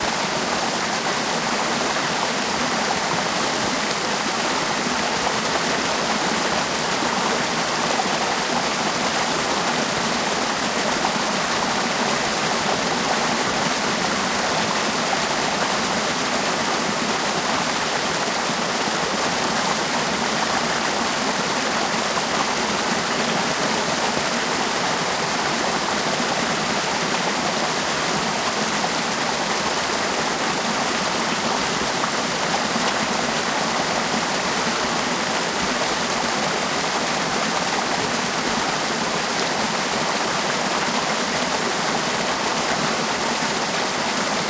Creek in Krka National Park, Croatia (Close recording)
A creek / river in Krka National Park in Croatia. Some insects in the background.
Recorded with Zoom H1 (internal mics)
cicadas, creek, crickets, Croatia, field-recording, forest, insects, Krka, nature, river, water